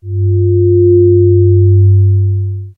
slobber bob F
Multisamples created with Adsynth additive synthesis. Lots of harmonics. File name indicates frequency. F
bass, metallic, additive, synthesis